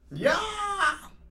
The sound of an old men screaming.
Foley, Screaming